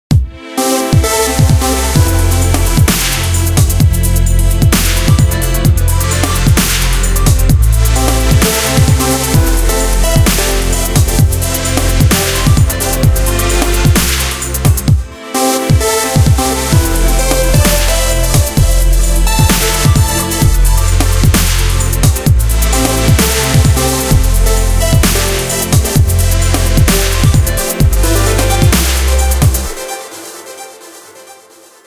Let Me Loop
Another clip from one of my uncompleted tracks. Like all my loops, it is not even 50% finished and is for anybody to do as they wish.
2013, bass, beat, clip, club, comppression, delay, drums, dubstep, electronic, eq, flanger, free, fruity-loops, fx, house, limters, loop, mastering, practise, reverb, sample, synths, trance